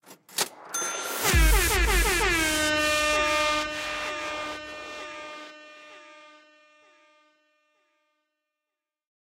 Has an airhorn for added epicness